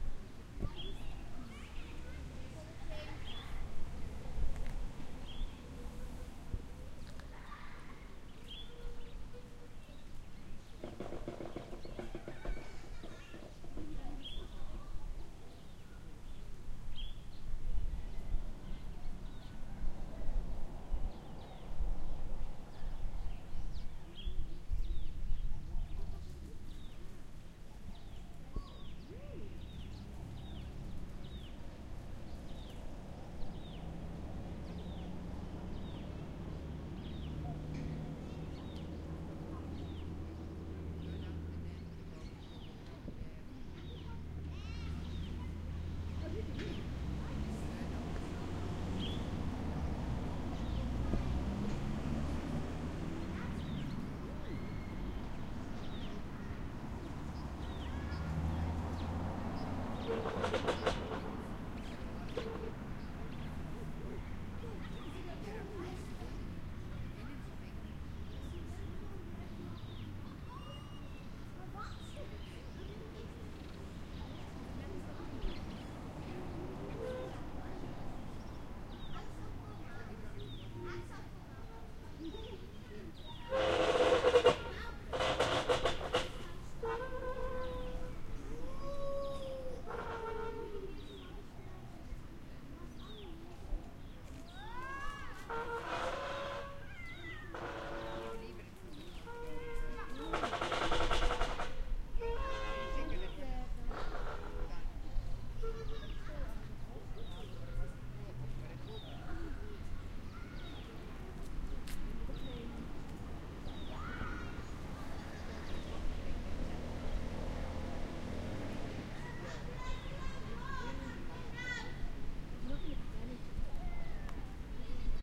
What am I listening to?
OWI Park Ambiance with children
Park ambiance of people and children in a crowded area. Zoom h6
children-playing, roomtone, Park-ambiance